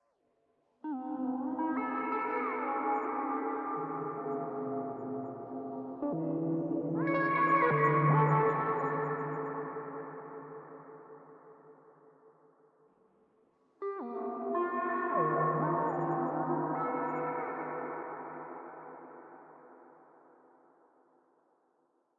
Made from a simple guitar sample processed to sound like a sad crying voice.